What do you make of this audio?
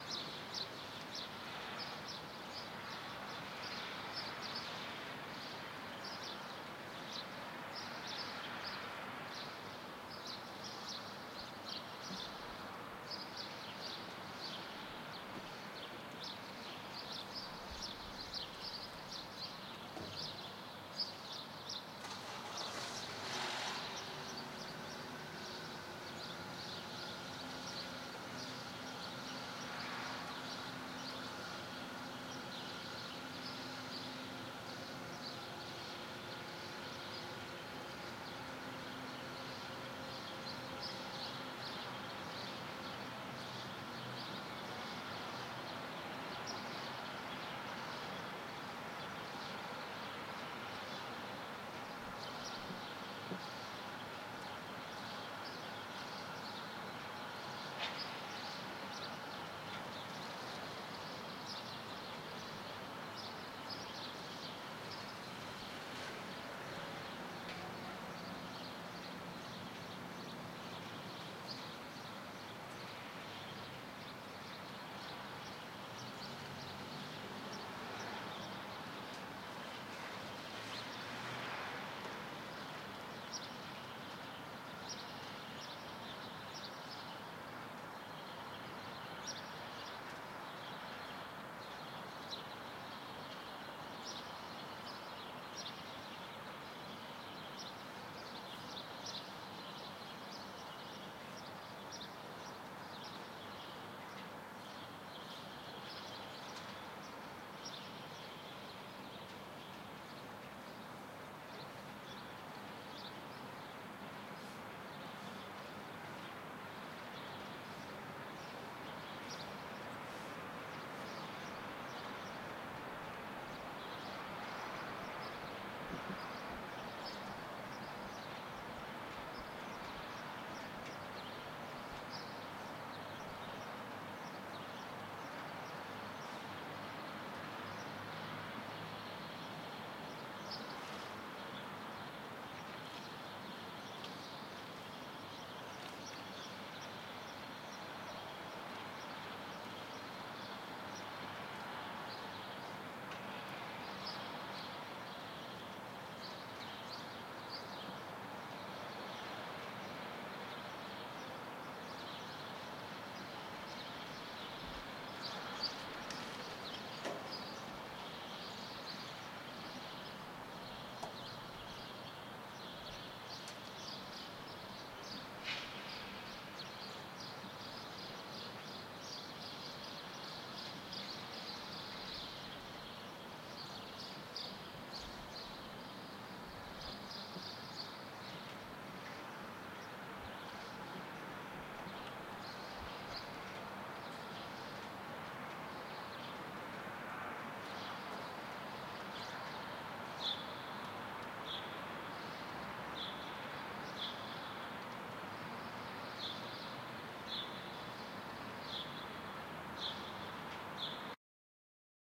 City morning
Recorded in St. Petersburg, Russia
morning, city, wind, bird, town